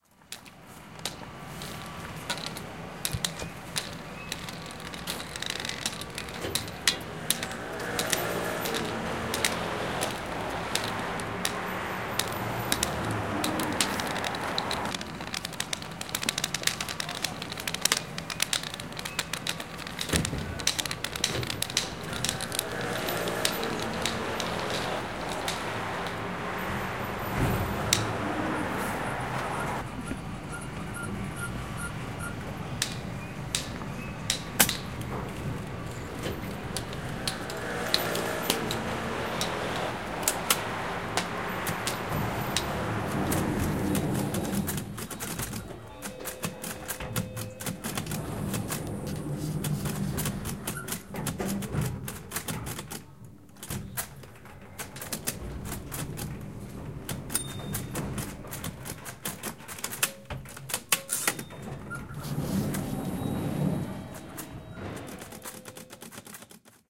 IDES-FRANCE-postcard-aleena et hayet
France, IDES, Paris